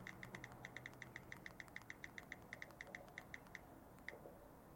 typing a text